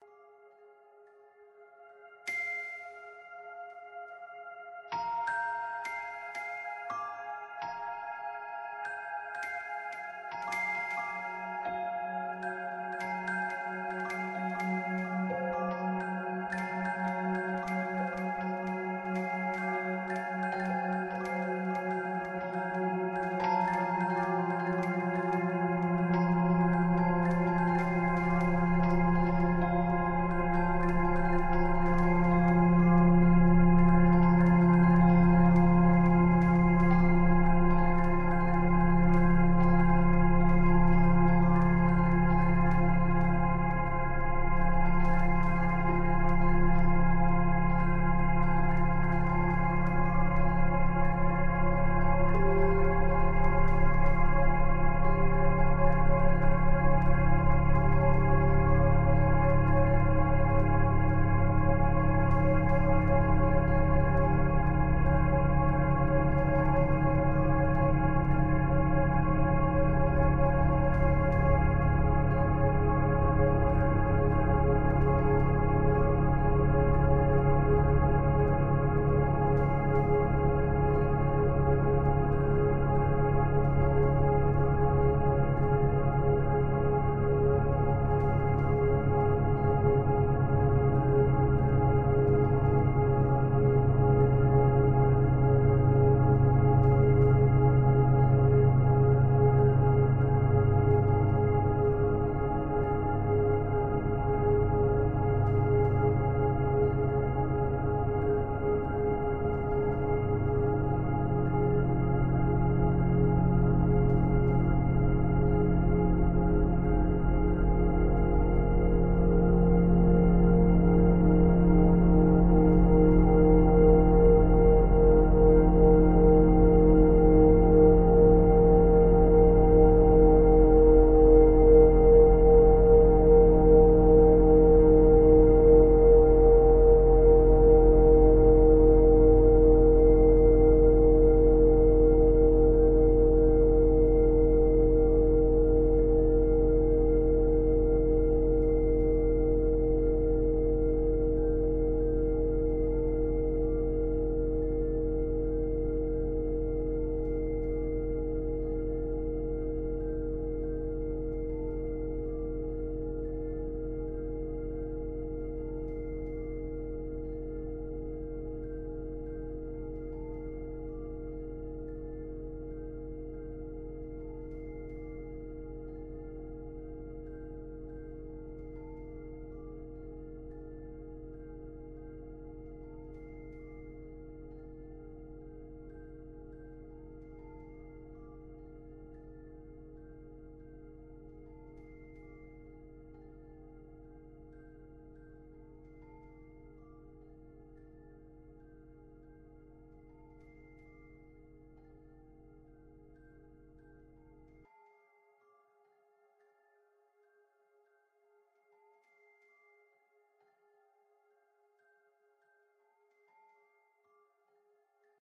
Revelation and Awe
I was trying to make some floaty meditation type music like people use for hypnotherapy and yoga and stuff but accidentally made something fractionally too menacing. To me it sounds like something you might have in the background of a scene where viewer is contemplating an unexpected cosmic vastness or an ancient alien artefact or something otherwise mindblowing but also potentially existentially threatening.
horror, space, galaxy, film, cosmic, exegetical, interstellar, interstitial, cinema, cinematic, atmosphere